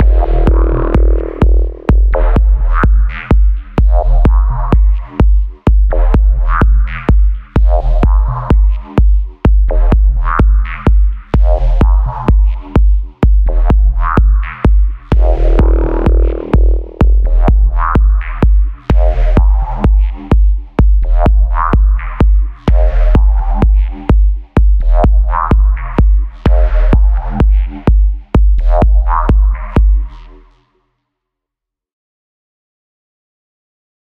Was messing around in Logic today and came up with this little 16-bar loop. Bassline is made with NI Razor synth (excellent piece of software!).
I can elaborate on this beat, give you any samples or variations if you want. Just send me a PM and I'll do my best!